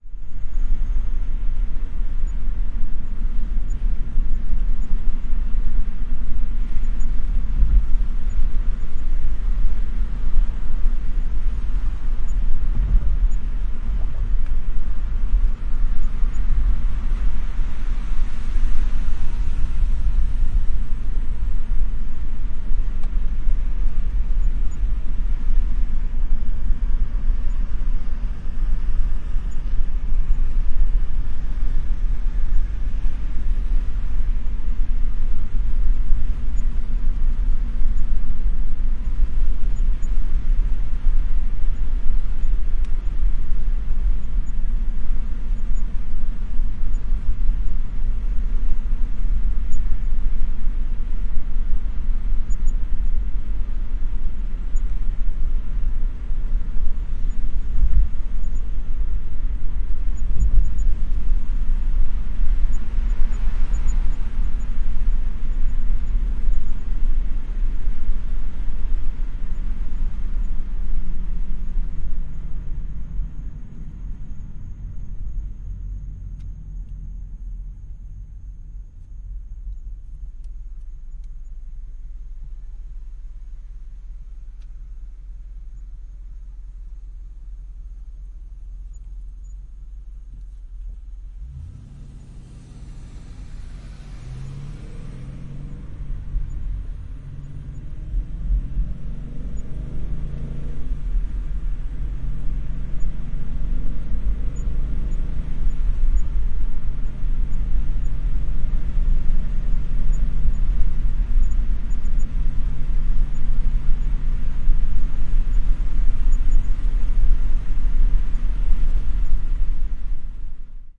A Friday night drive down the highway. Car stops at red light, resumes driving at green light. Not much else to say besides the occasional passing car, and the nice sound our car makes when it accelerates.